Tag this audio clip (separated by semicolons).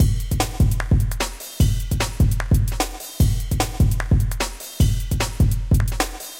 150bpm; drumloop; korgGadget